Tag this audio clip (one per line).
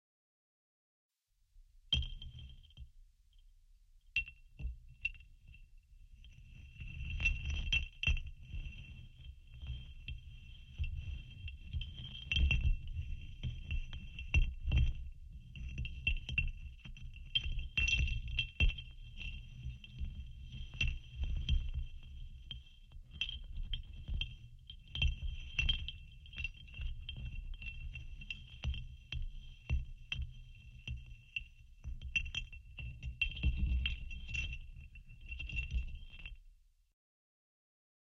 clank
Bottles
clanking
foley